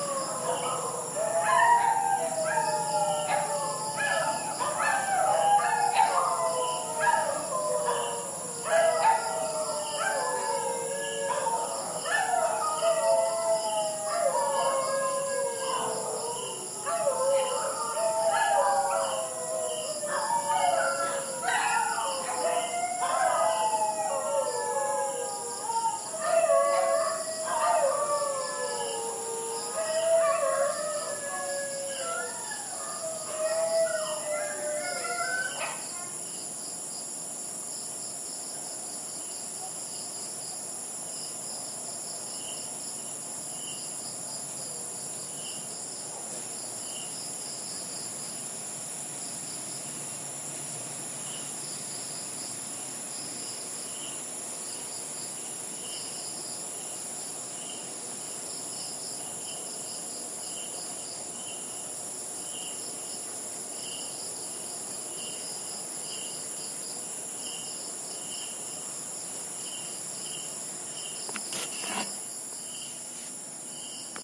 2017-02-08 Dumaguete 1115pm
Recorded with an iPhone in Dumaguete, Philippines. Evening ambiance in the suburbs, particularly of dogs.